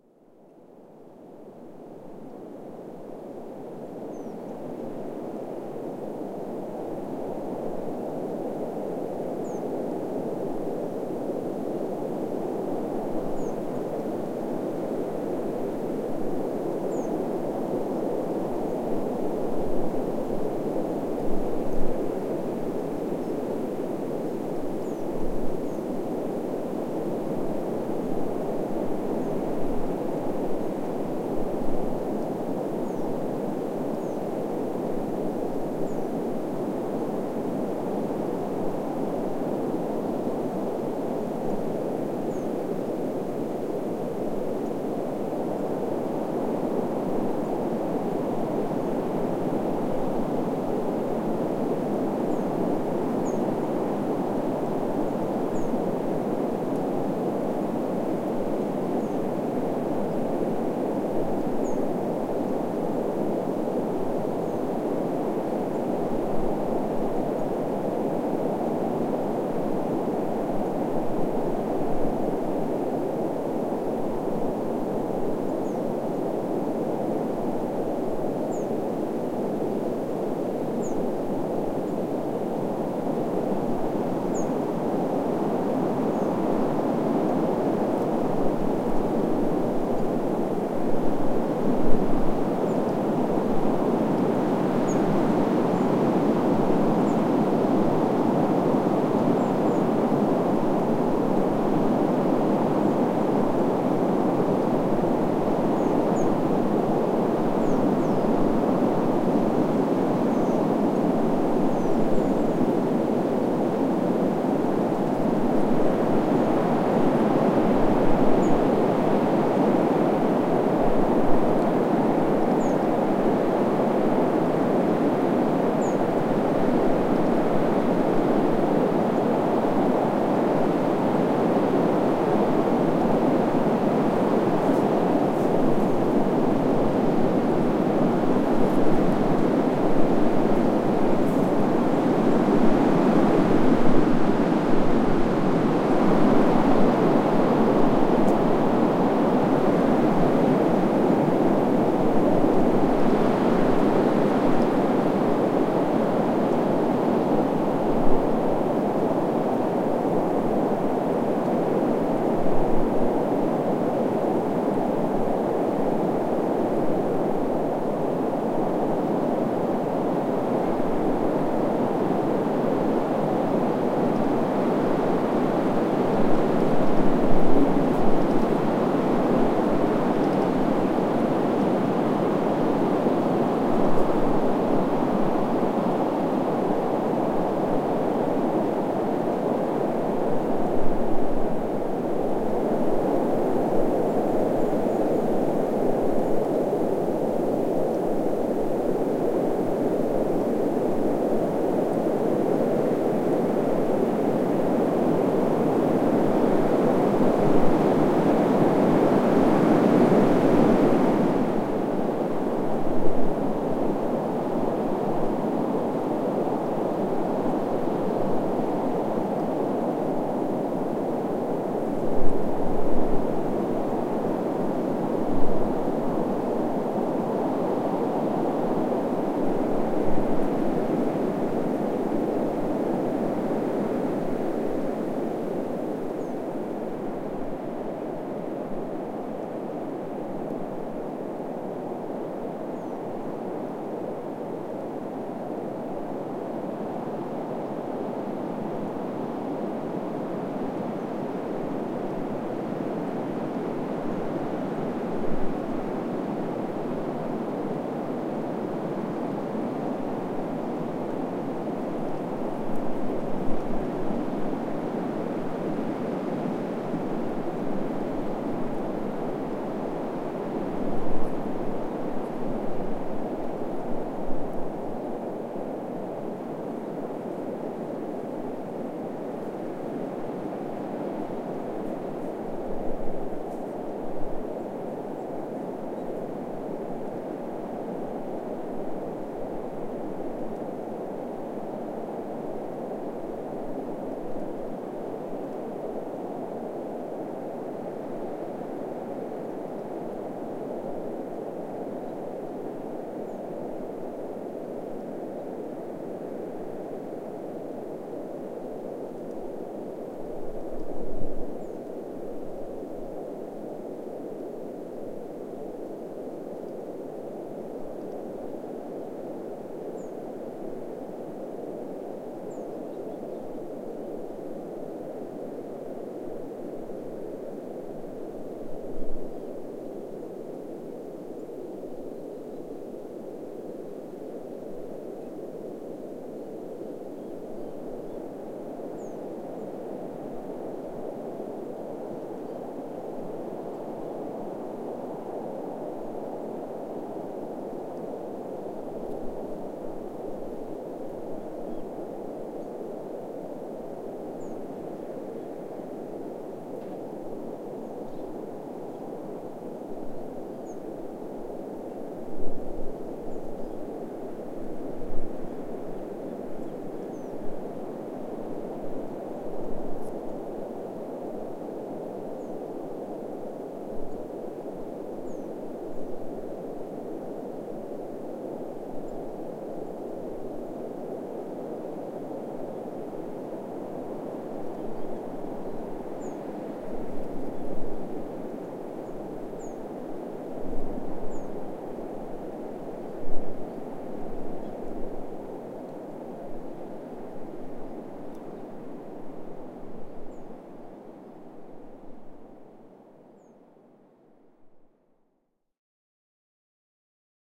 Pink Lakes Wind Atmos #2
The atmos of a small she oak forest at the edge of Pink Lakes Salt Lakes in Victoria Australia. I love the sound these trees make and "tune into them" whenever they are around.
Still looking for the perfect wind recording!
Recorded with a MS set up using a Sennheiser 416 paired with Sennheiser Mkh-30 into a Zoom H4n. I then synced this up with another recording from a different position using a Zoom 4n.
trees, birds, ambient, field-recording, desert, ambience, atmos, gusts, wind, white-noise, ambiance, nature, atmosphere, windy, Australia